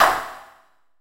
Made by layering hits on a old radiator and white noise.